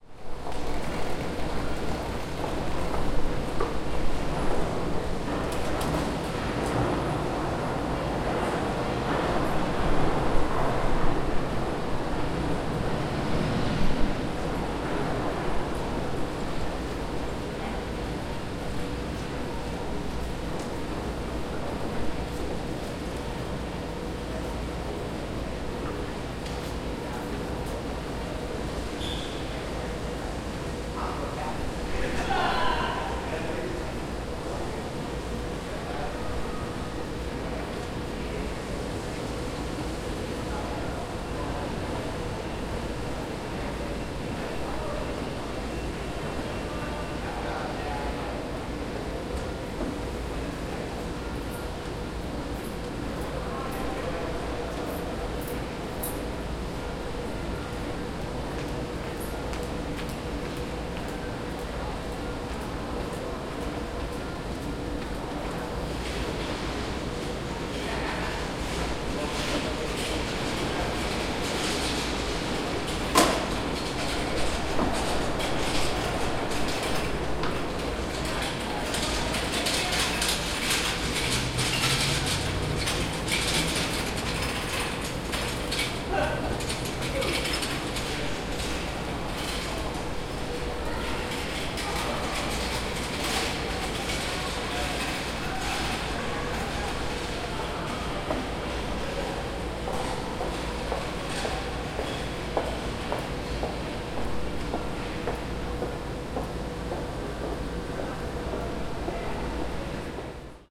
AMB OfficeMallEmbarc Tscm0033
Ambience recorded in a semi-open office type mall; escalator sound in background; people walking by, rolling carts by. Recorded on TascamD40 with internal mic